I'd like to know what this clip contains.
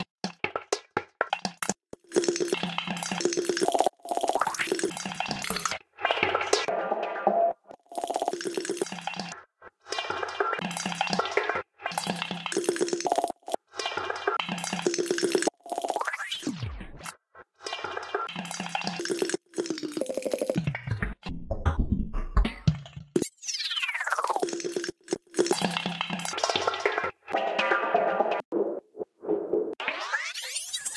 Perc 01 Resamp 124bpm
I created these glitchy percussive noises for a tune I was working on. It was created using Ableton Live.
glitch, manipulation, re-pitch, fx, percussion